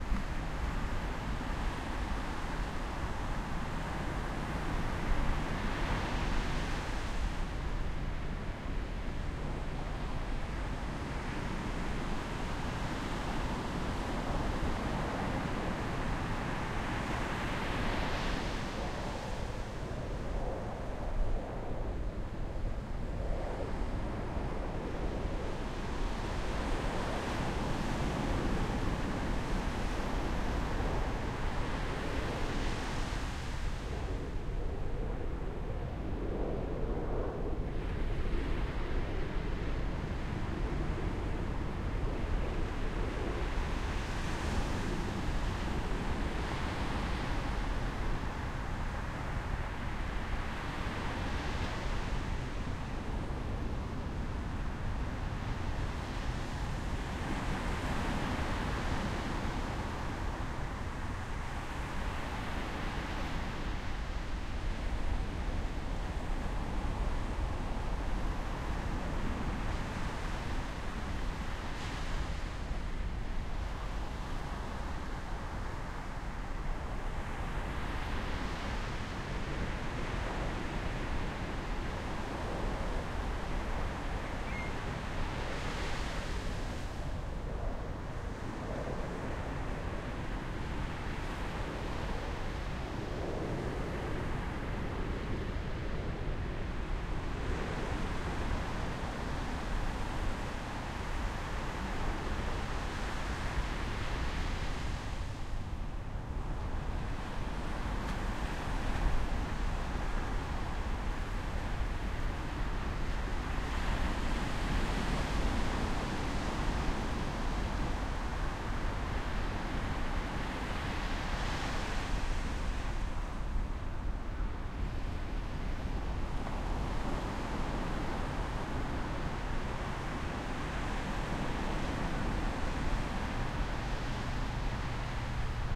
Sounds of the beach waves crashing, with seagulls crying in the distance.